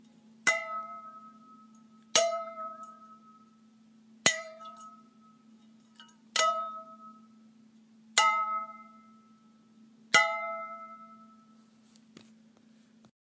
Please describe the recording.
Strange noise from metal water bottle with a little bit of water at the bottom.